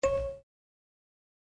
Some recordings of a small kikkerland music box set.